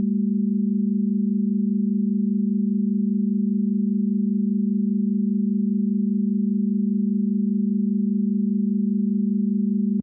base+0o--3-chord--05--CDB--100-70-12
test signal chord pythagorean ratio
ratio, test